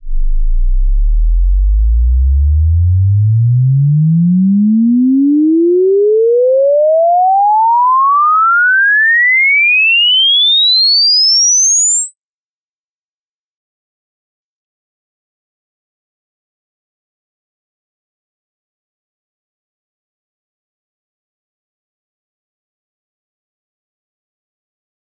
This is the original sound played out through the speakers in all the IR recordings in this pack, use this to deconvolve with the other recordings (they should be all synced up). This was made for the Waves IR-1 Plugin but it can be used with other programs.
Happy Deconvolving!
Impulse-Response, IR, IR-1, IR-Sweep